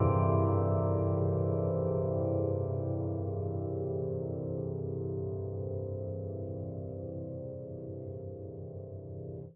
Fading piano chord without initial attack, bell like sound
bell, chord, drone, fading, piano